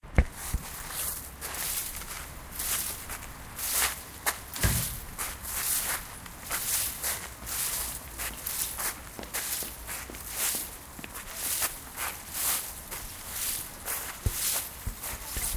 session 3 LBFR Amélie & Bryan [5]
Here are the recordings after a hunting sounds made in all the school. Trying to find the source of the sound, the place where it was recorded...
labinquenais, sonicsnaps, france, rennes